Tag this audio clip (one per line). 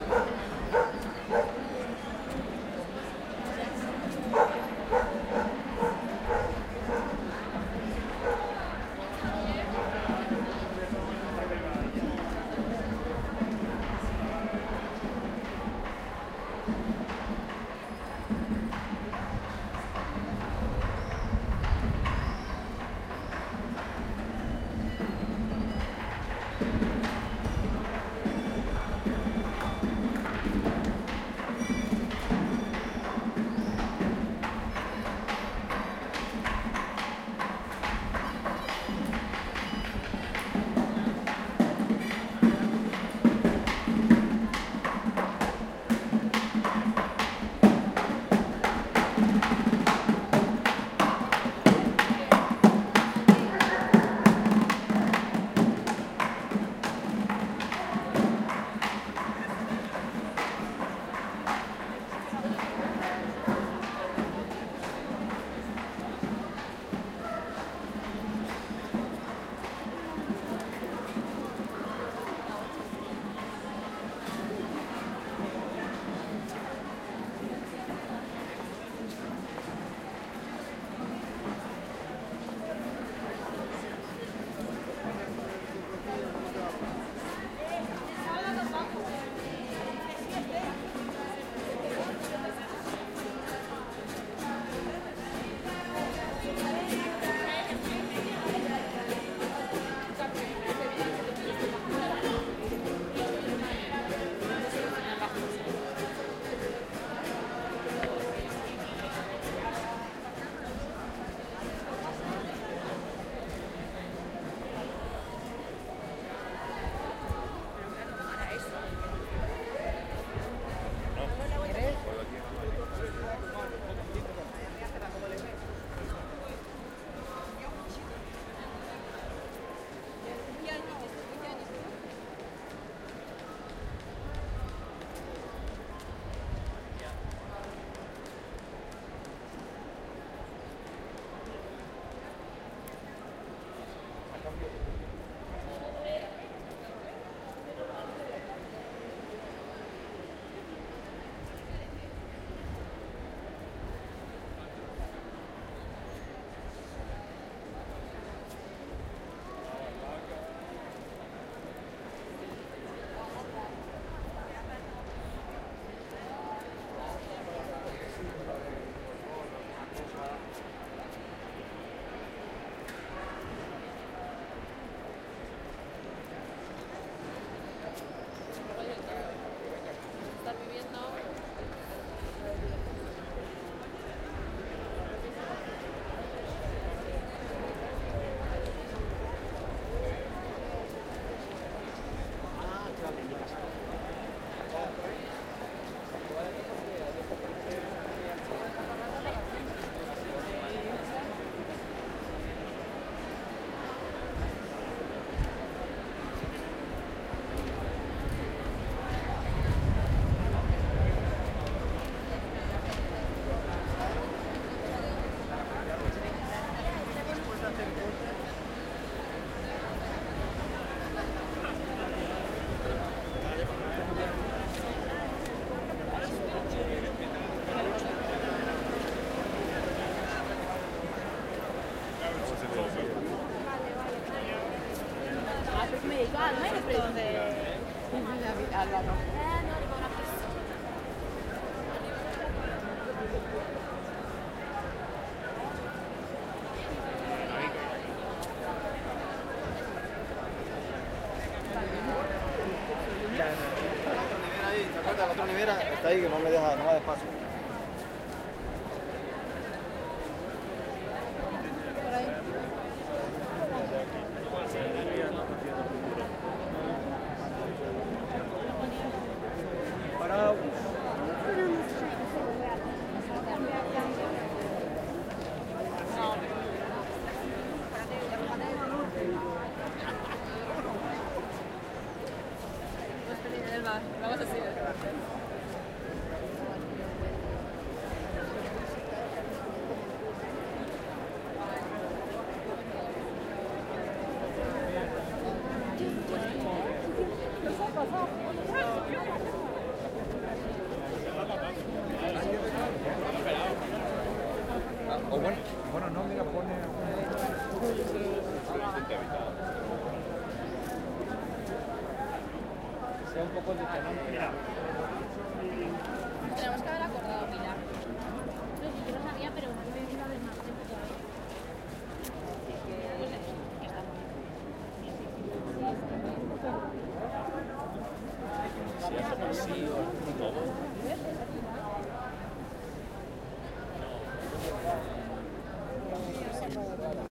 percussive
street
conversation
city
ambient
spanish
madrid
walking
voice